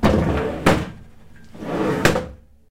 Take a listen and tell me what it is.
Moving something heavy by hand. Recorded with Edirol R-1 & Sennheiser ME66.
bassy, heavy, push, pushing, pulling, squeaks, pulled, pushed, motion, movement, pull, load, squeaky, moving, loaded